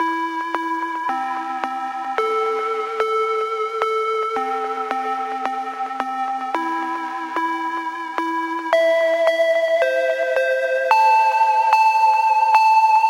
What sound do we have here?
Ambient Melody
Ambient guitar melody layered with multiple other sounds. 110bpm
ambient, chillstep, guitar, melody